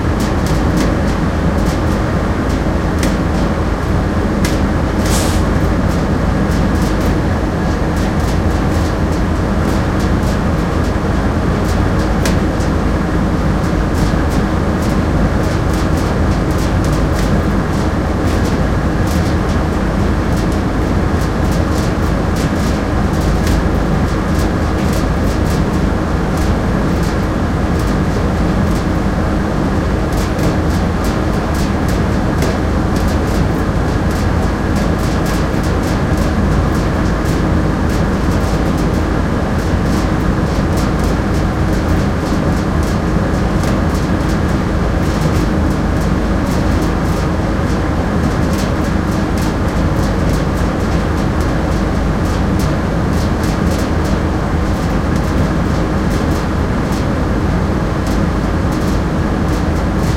vent heavy ventilation metal rattle closeup underneath
closeup, ventilation, rattle, heavy